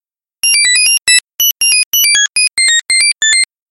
A simple computer music, high beeps.